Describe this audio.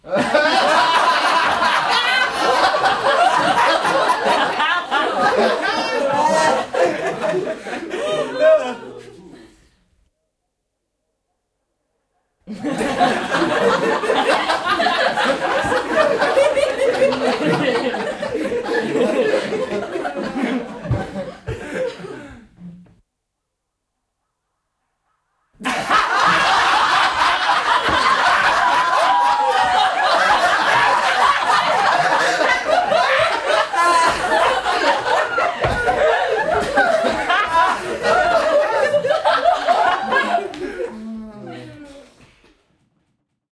Ihmisjoukon iloista naurua sisällä. Kolme versiota.
Paikka/Place: Saksa / Germany
Aika/Date: 1985